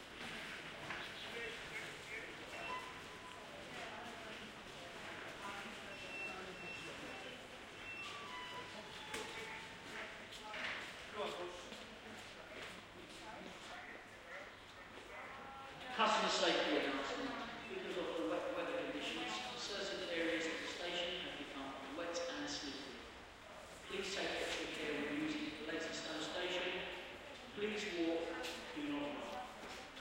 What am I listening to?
30-sec binaural field recording, recorded in late 2012 in London, using Soundman OKM II microphone.
This recording comes from the 'scene classification' public development dataset.
Research citation: Dimitrios Giannoulis, Emmanouil Benetos, Dan Stowell, Mathias Rossignol, Mathieu Lagrange and Mark D. Plumbley, 'Detection and Classification of Acoustic Scenes and Events: An IEEE AASP Challenge', In: Proceedings of the Workshop on Applications of Signal Processing to Audio and Acoustics (WASPAA), October 20-23, 2013, New Paltz, NY, USA. 4 Pages.
ambiance, ambience, binaural, c4dm, field-recording, london, qmul, tubestation